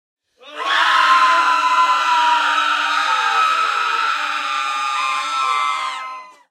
A group of persons standing near 2 different microphones making a fairly long scream.Recorded with a SM 58 and a Behringer B1 via an Mbox (panned in Logic Express -30+30) giving a typical stereo sound.